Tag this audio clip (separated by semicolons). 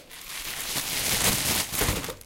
accident; alpine; avalanche; blizzard; hit; impact; ski; skiing; snow; sound; thud